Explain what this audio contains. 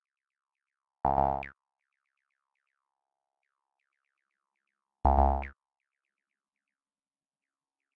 Some recordings using my modular synth (with Mungo W0 in the core)